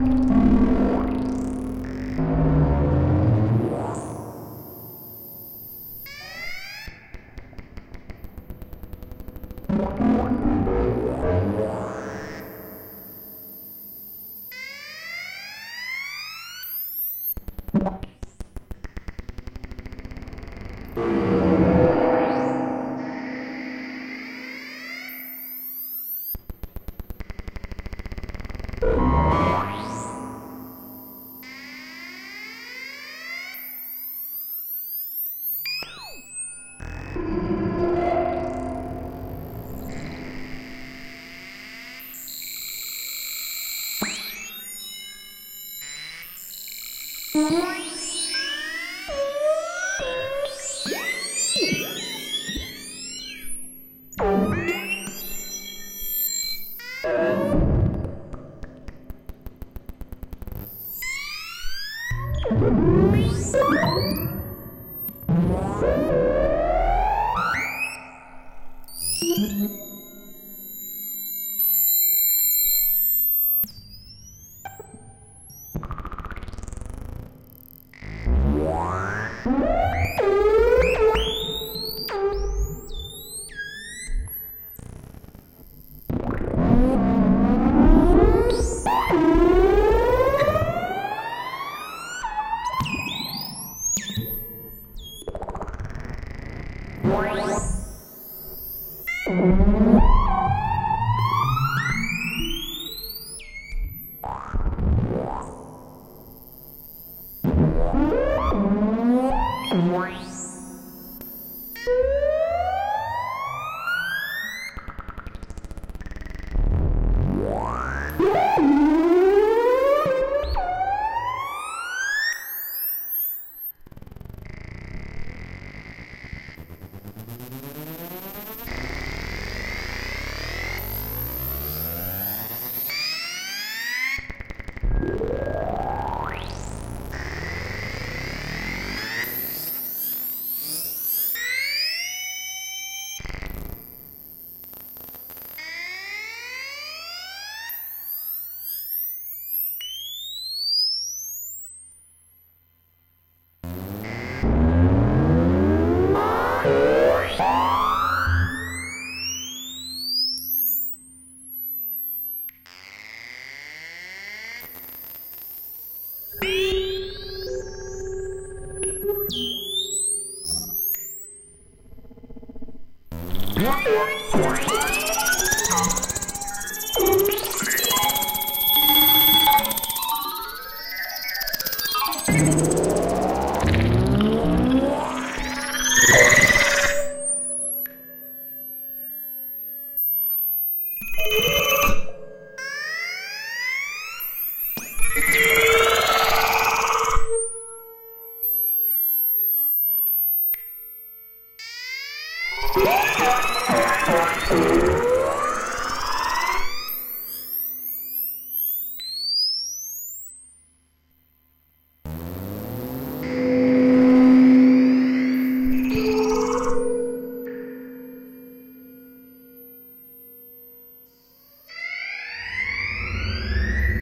A drone patch on a doepfer system. The system gets gates and cv from a telephone pickup listening on the harddrive and fans on a macbook. I think everything passes through the A199 - Spring reverb module aswell.
a-199, doepfer, drone, pickup, telephone